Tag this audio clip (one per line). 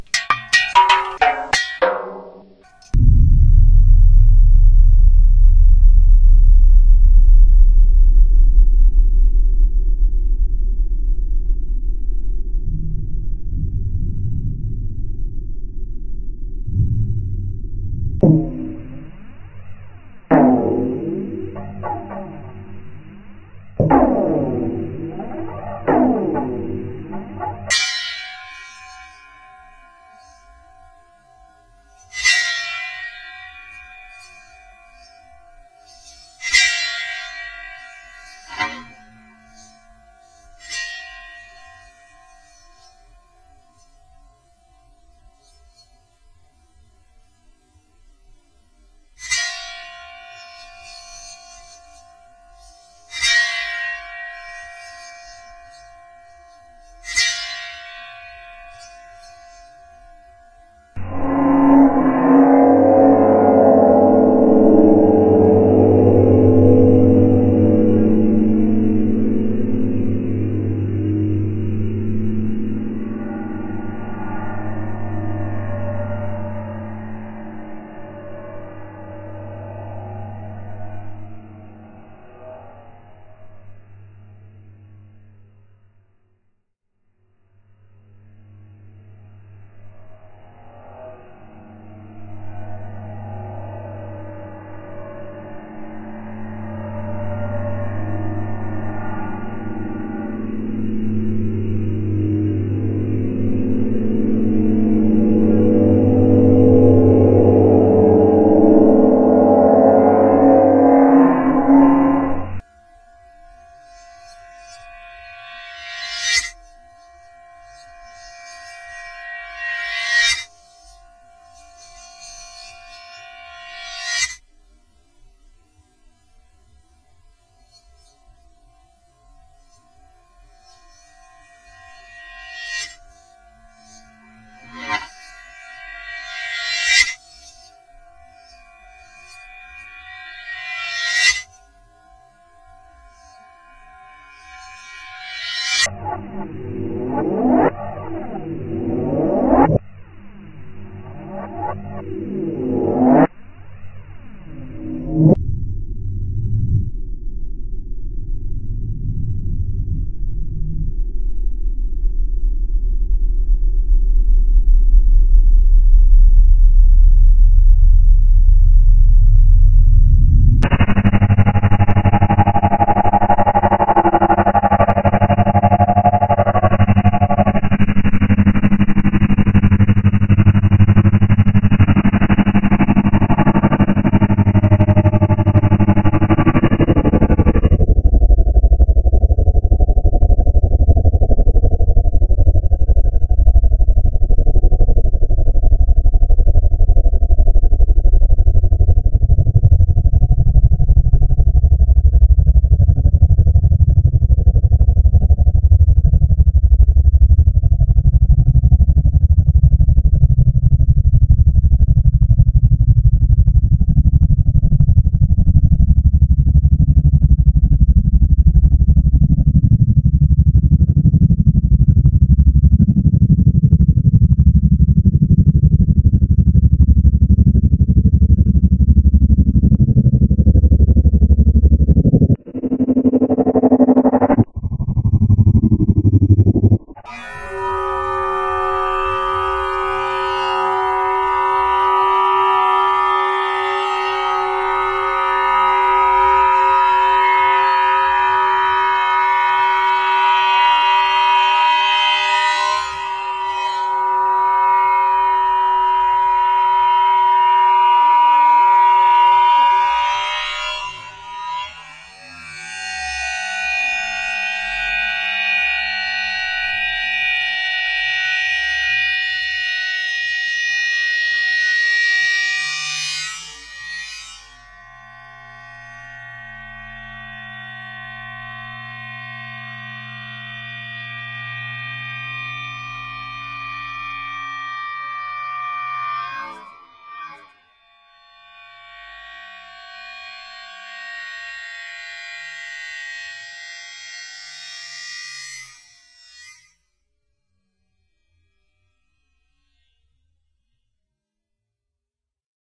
psychotherapy
relaxation